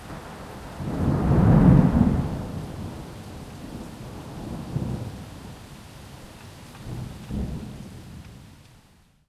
NYC Rain Storm; Some traffic noise in background. Rain on street, plants, exterior home.